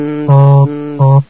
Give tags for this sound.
handmade
invented-instrument

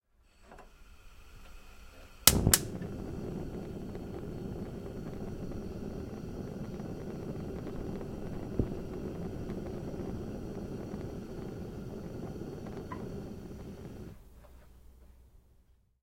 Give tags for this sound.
gas-ring
ignition
sound-effects

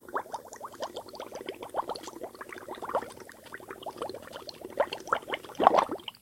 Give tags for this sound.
blow
bubble
bubbles
cup
liquid
pop
water

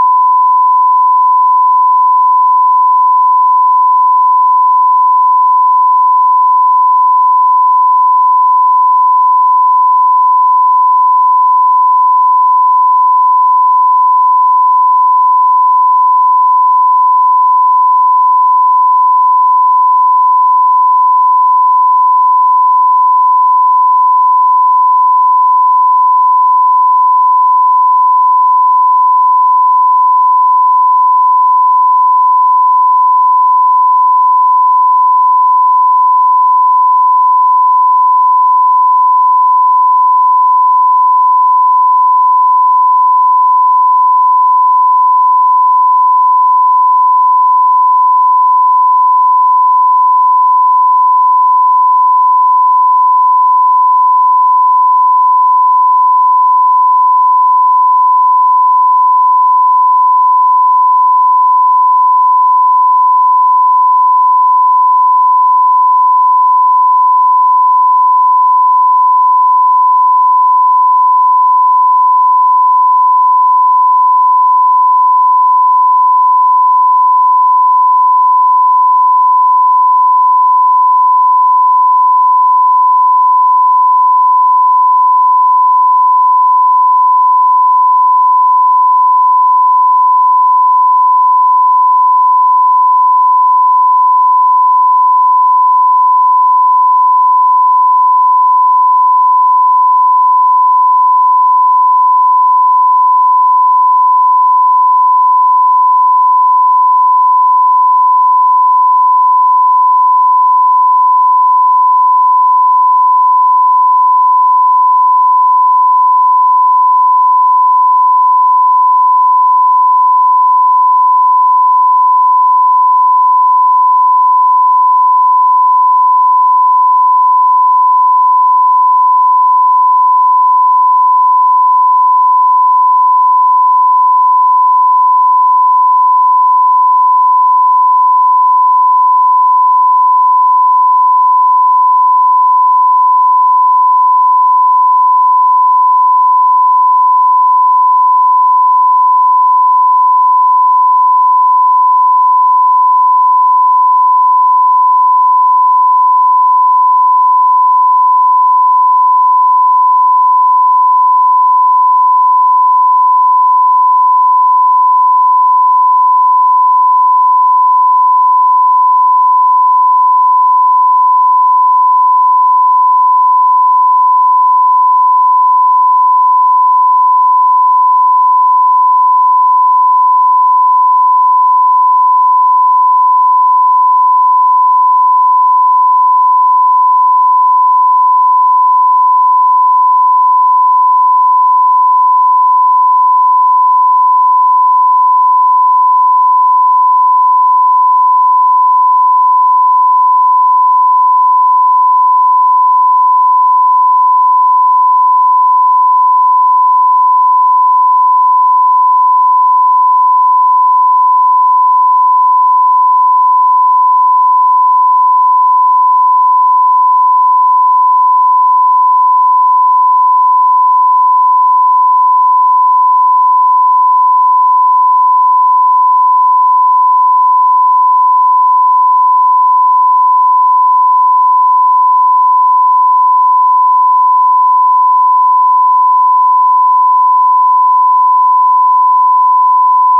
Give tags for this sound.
electric
sound
synthetic

